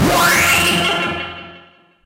Fake C64 sound effect featuring the kind of arpegiated sound that was characteristic to the C64 music and some sound effects.
This is a fake, produced by a completely unrelated method (see below) - no C64 or other vintage computer was used, no software designed to emulate the C64 sound was used. It just happened by chance.
This is how this sound was created.
The input from a cheap webmic is put through a gate and then reverb before being fed into SlickSlack (an audio triggered synth by RunBeerRun), and then subject to Live's own bit and samplerate reduction effect and from there fed to DtBlkFx and delay.
At this point the signal is split and is sent both to the sound output and also fed back onto SlickSlack.